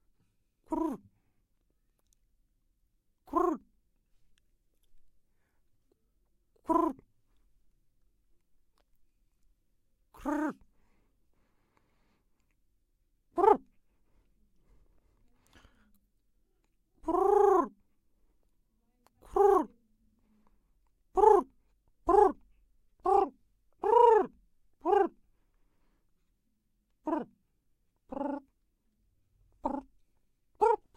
The NEUMANN TLM 103 Condenser Microphone was used to represent a pigeon, made by a human
Recorded for the discipline of Capture and Audio Edition of the course Radio, TV and Internet, Universidade Anhembi Morumbi. Sao Paulo-SP. Brazil.
MANO, UMA, OSASCO, PIGEON, 5MAUDIO17